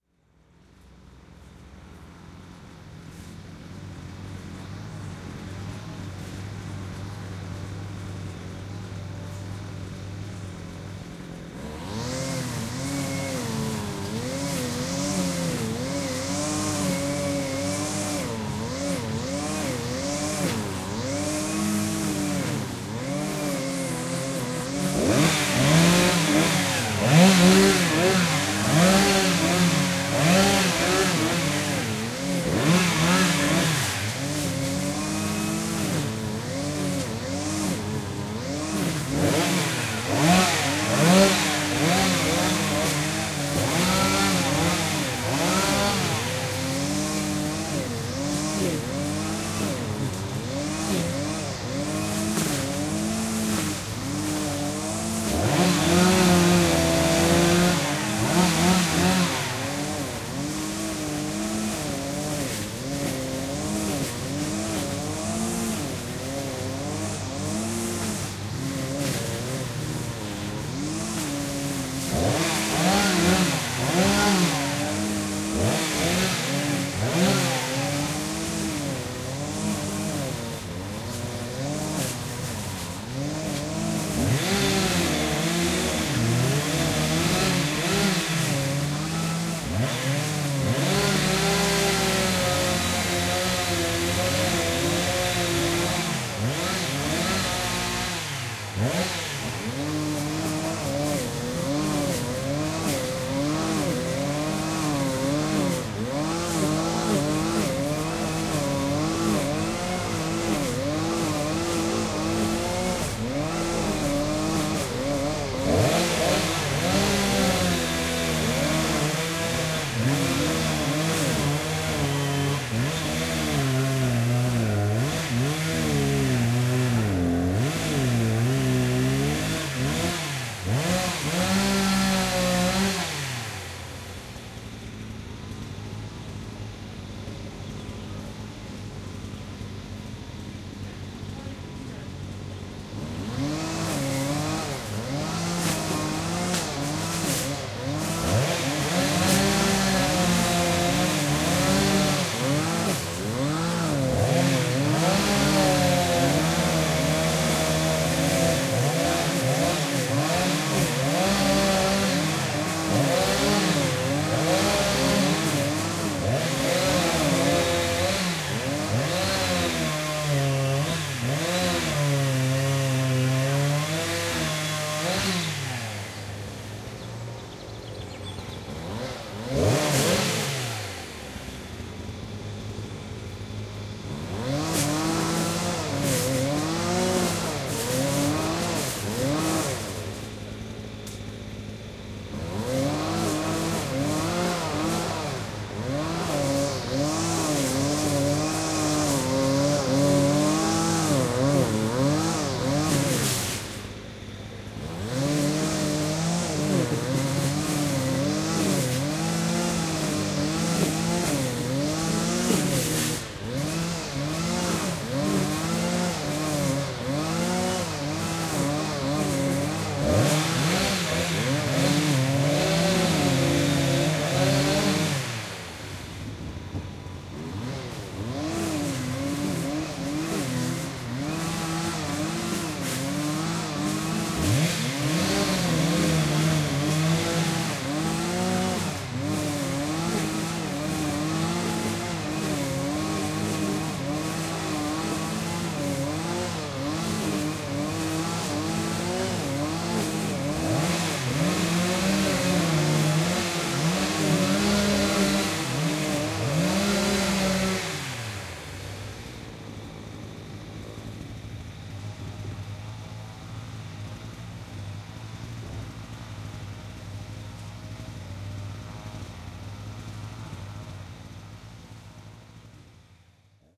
Strimmers And Chainsaw 1
A stereo field-recording of some strimmers and a chainsaw clearing a plot of land of brushwood and small trees. Rode NT-4 > FEL battery pre-amp > Zoom H2 line in.
chainsaw, field-recording, machinery, stereo, strimmer, trees, wood, xy